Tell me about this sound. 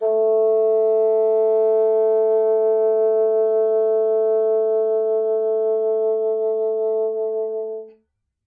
One-shot from Versilian Studios Chamber Orchestra 2: Community Edition sampling project.
Instrument family: Woodwinds
Instrument: Bassoon
Articulation: vibrato sustain
Note: A3
Midi note: 57
Midi velocity (center): 63
Microphone: 2x Rode NT1-A
Performer: P. Sauter
a3, bassoon, midi-note-57, midi-velocity-63, multisample, single-note, vibrato-sustain, vsco-2, woodwinds